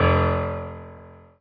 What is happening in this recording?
Piano ff 009